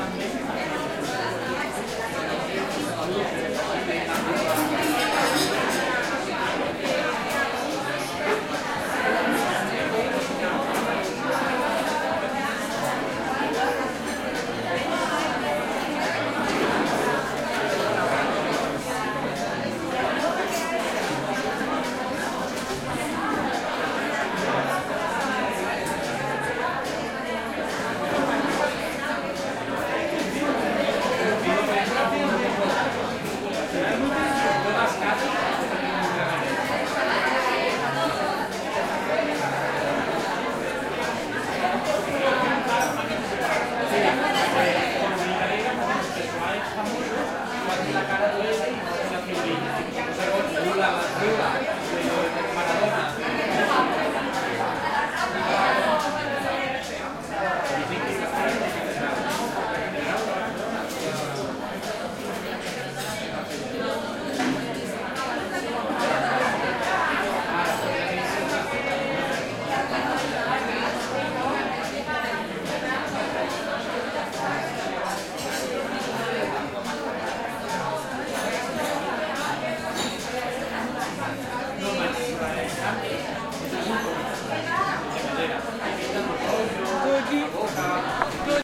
This recording is done with the roalnd R-26 on a trip to barcelona chirstmas 2013.